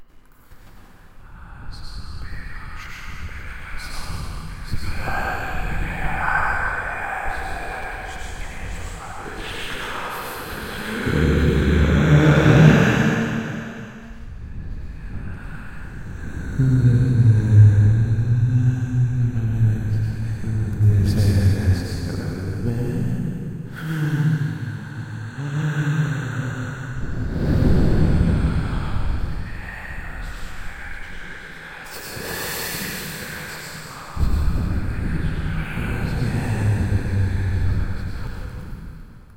Ghost Whispers 1
Here's a creepy little whisper track I made in Adobe Premiere just messing around with the delay setting. I can see some uses for it, but none for me at the time, so I decided I'd put it up here!
Enjoy!